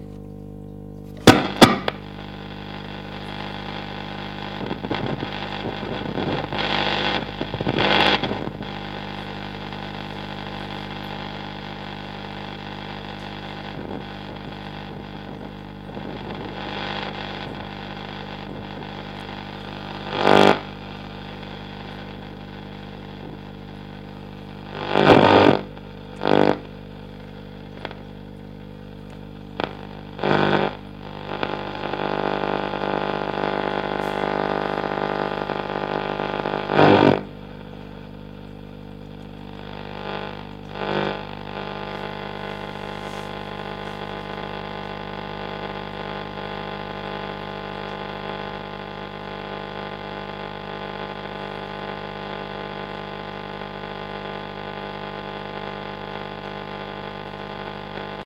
humming noise and other noises made by my vintage Telefunken valve radio.
hum, valve-radio, flickr, telefunken, antique